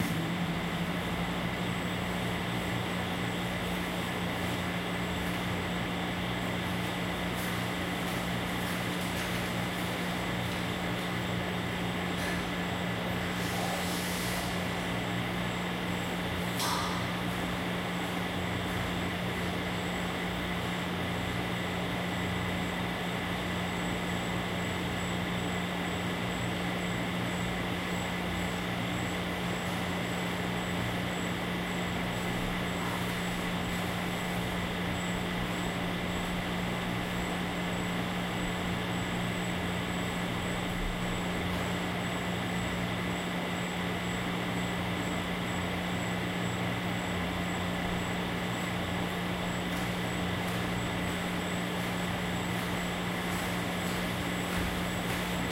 night ambience at home
soundscape, night, noise, field-recording